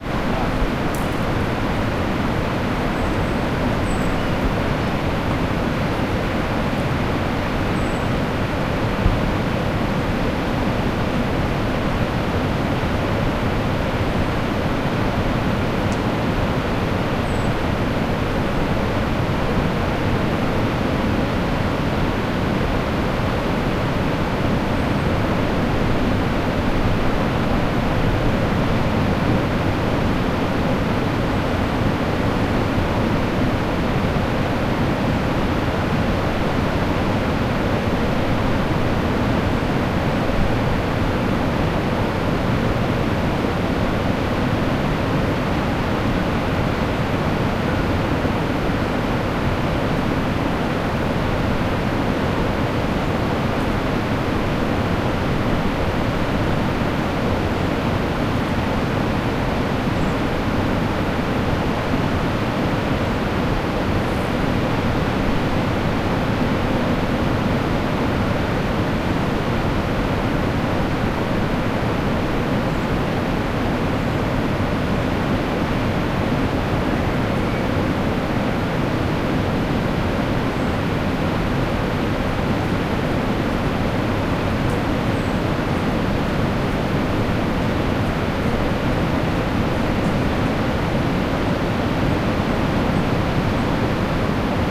Ruby Beach on the Pacific Ocean, Olympic National Park, 20 August 2005, 7pm, 500ft from ocean on a path down to the shore.
beach, footsteps, ocean, pacific, shore, surf, windchimes